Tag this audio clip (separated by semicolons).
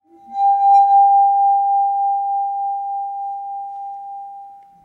rim; wineglass; finger